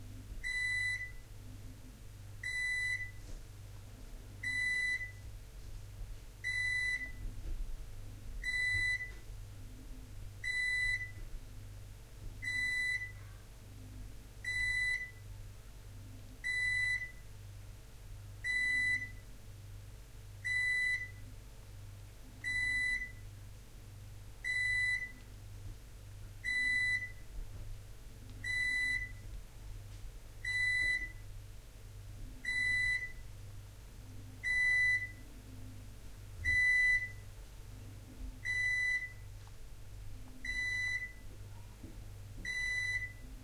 Microwave alarm
The sound of my microwave oven sounding off the annoying alarm, to tell me the meat is defrosted.
Recorded with a TSM PR1 portable digital recorder, with external stereo microphones. Edited and in Audacity 1.3.5-beta.